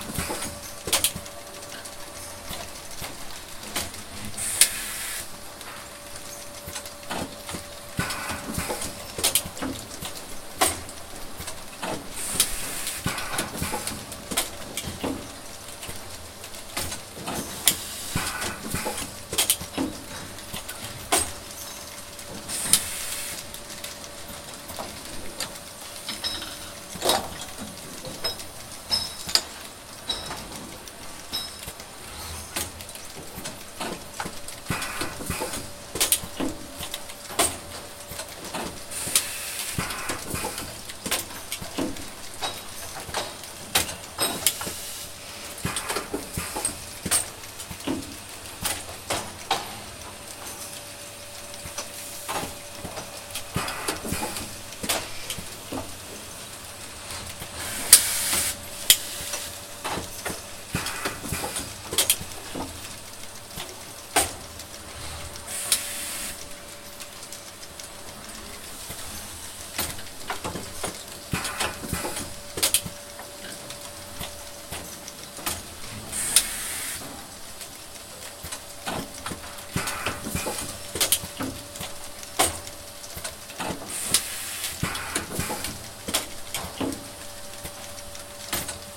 Robot Assembly
Small robot assembling parts made of aluminum and other metals. Recorded with Tascam DR-40, internal mics.